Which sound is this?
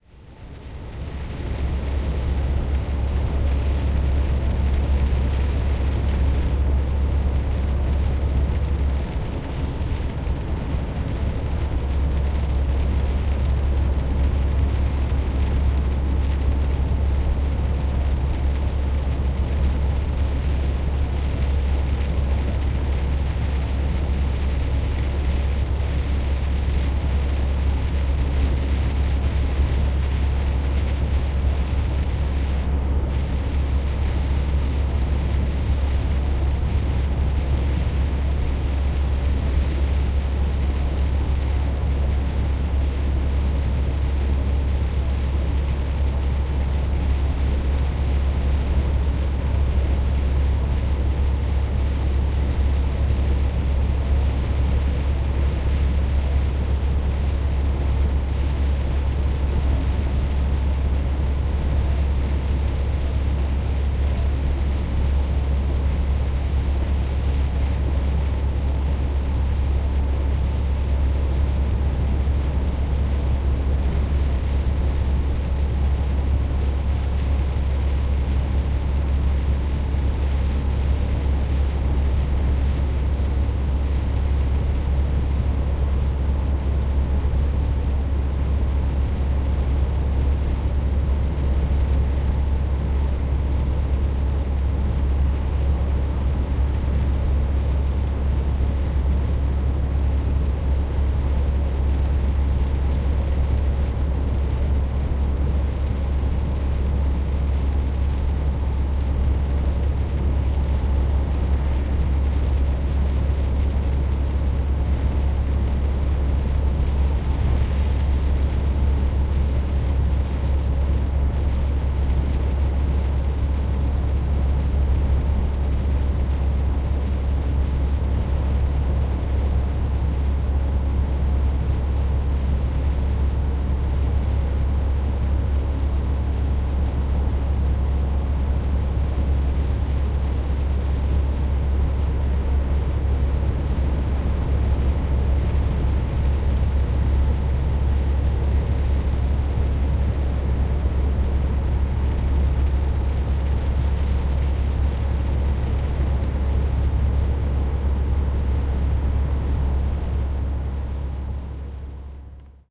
07.08.2011: eighth day of the ethnographic research about truck drivers culture. Rainy route between Kolding and Denmark and Gluckstadt in Germany. Somewhere on the motorway.